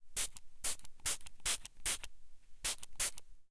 Anti-mosquito zilch spray. TASCSM DR-05 + Panasonic WM-61
can, spray, spraying